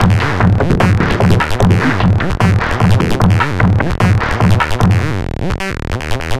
InTro HoUSe ACIDRIYTHM
breakbeat, acid, drumloops, hardcore, rythms, sliced, processed, electro, glitch, experimental, idm, drums, electronica, extreme